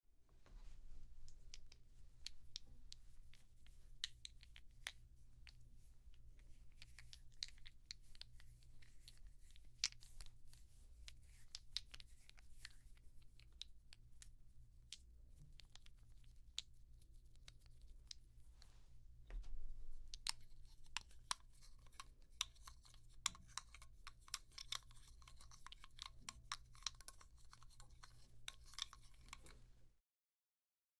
82-Carrying Mannequin
Object, Carrying, Mannequin